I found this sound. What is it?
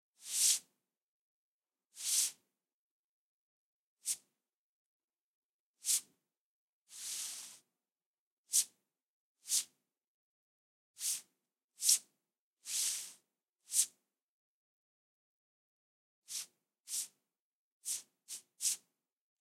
sliding/moving about some paper on a table